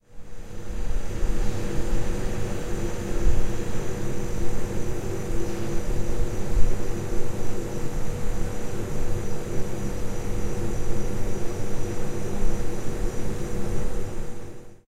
refridgerator noise
motor, freezer, campus-upf, UPF-CS14, compressor, refridgerator